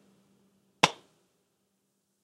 dhunhero slammouse2
Another take of the mouse slam.
impact, slam